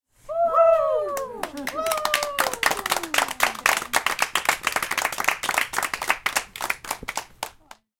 recording of a small group at a bithday party.